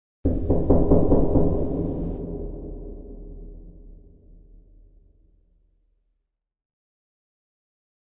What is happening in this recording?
Knocking sound with little post production